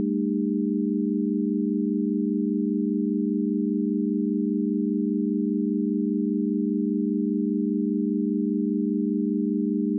test signal chord pythagorean ratio